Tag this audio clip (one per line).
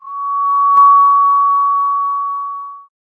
drama
ears
horror
horror-effects
horror-fx
suspense
terrifying
terror
thrill
tinnitus